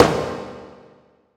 hamr SnareLoRevrb
Modified sound of a hammer.